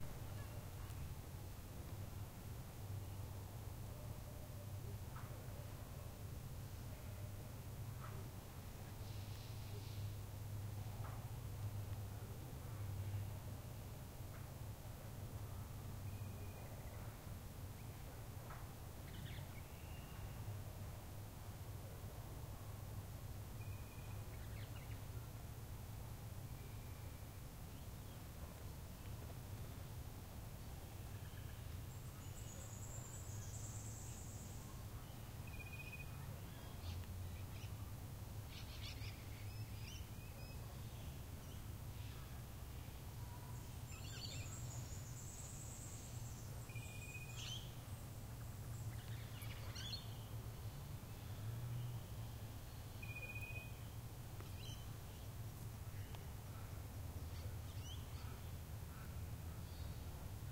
Bush Atmos 05
Bush / forest atmosphere with wide stereo image and various sounds.
birds, blowing, bush, flies, mosquito